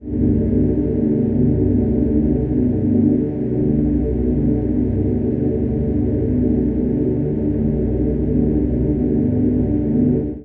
pad gas05
guess what? gas fashioned pad nr.05...